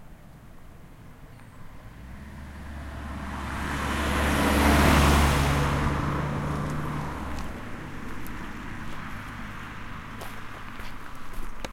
CAR PASSAGE - 1
Son du passage d'une voiture. Son enregistré avec un ZOOM H4NSP et une bonnette Rycote Mini Wind Screen.
Sound of a car passage. Sound recorded with a ZOOM H4NSP and a Rycote Mini Wind Screen.
passing, car, passage, voiture